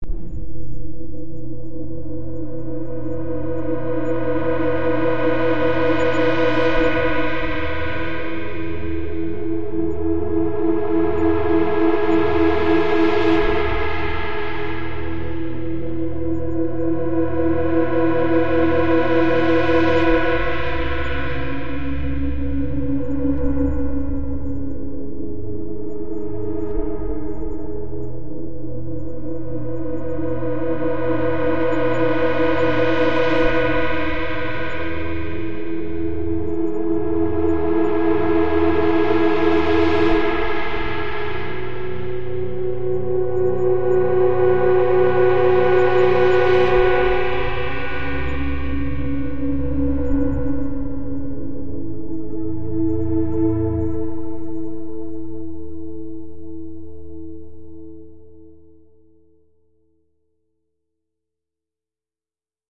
Uneasy Drone & Ambiance
drone, evolving, ambient